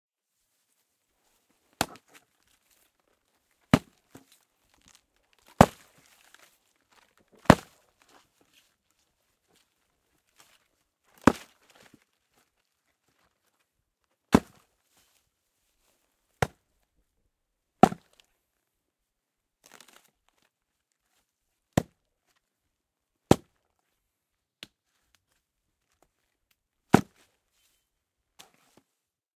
Axe Chopping
Recoreded with Zoom H6 XY Mic. Edited in Pro Tools.
Just a few axe hits with a little natural reverb.
hit, wood, field-recording, chopping, axe